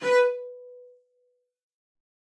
One-shot from Versilian Studios Chamber Orchestra 2: Community Edition sampling project.
Instrument family: Strings
Instrument: Cello Section
Articulation: spiccato
Note: B4
Midi note: 71
Midi velocity (center): 95
Microphone: 2x Rode NT1-A spaced pair, 1 Royer R-101.
Performer: Cristobal Cruz-Garcia, Addy Harris, Parker Ousley
b4, cello, cello-section, midi-note-71, midi-velocity-95, multisample, single-note, spiccato, strings, vsco-2